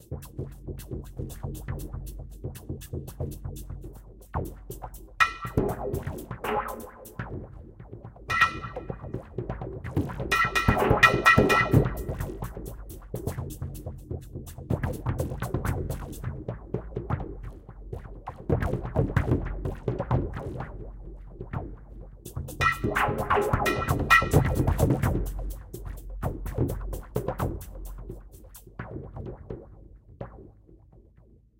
War Drums 9
Keyboard improve processed through virtual synthesizer as "Sountrack Percussion Boingo". Has a sci fi sound with a very fast beat.
boingo, drums, percussion, percussion-loop, percussive, rhythm, synthesizer